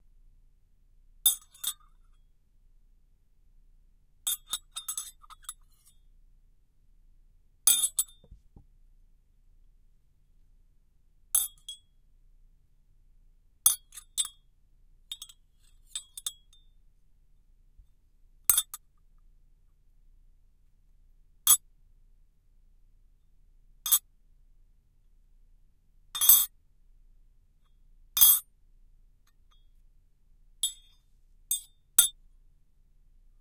SHOT GLASS CLANKS
-Shot glass clanking and striking
clank, clanking, clanks, cup, glass, hit, hits, hitting, mug, shot, strike, strikes, striking